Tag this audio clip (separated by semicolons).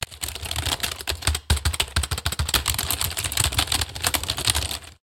fingers; keyboard; typing